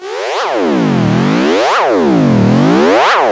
SCIAlrm 8 bit ping pong chorus
8-bit similar sounds generated on Pro Tools from a sawtooth wave signal modulated with some plug-ins
alarm, alert, beep, robot, scifi, spaceship, synth